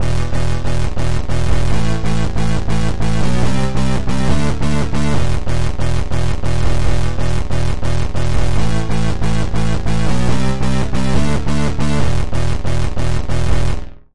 wobble flat0121
real wobble flat tune. 140 tempo. If use send me link for song.